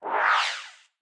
Space Swoosh - brighter
a spacey swoosh
bleep, blip, button, click, event, fi, flourish, game, gui, sci, sfx, short, swoosh